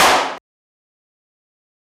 Clap 1 - room
This is a record from our radio-station inside the rooms and we´ve recorded with a zoomH2.